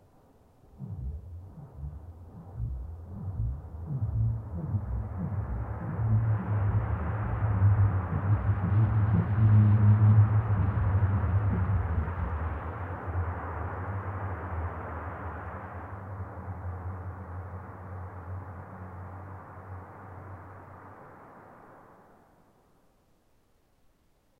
KELSOT12 longer slide hydrophone deeper
Booming sound created via an avalanche on Kelso Dunes recorded on hydrophone buried in dune.
california, mojave-desert, musical, sand